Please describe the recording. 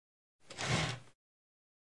Wooden chair moves on ground
chair-dragging, chair-on-ground, movement, furniture, wooden-chair, chair-moving